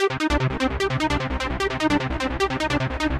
A bass line. if you can figure out the sequence by ear it really isn't too complex just 3 octaves and a little delay. 150 bpm